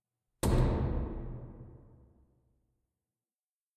S Spotlight Off
large spotlight turning on
turning, spotlight, large